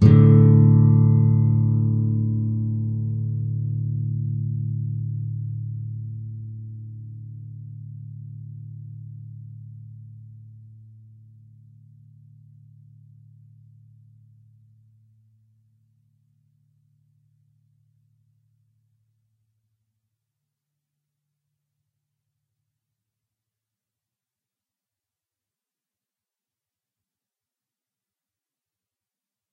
Open A (5th) and D (4th) strings in a chord. Sounds like a D 5th chord when used with E 5th.